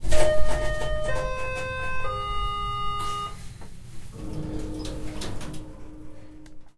lift beeps
This melody rings in my lift when it arrives to the destination floor.
Recorded with Zoom H4n
melody, lift, elevator, beep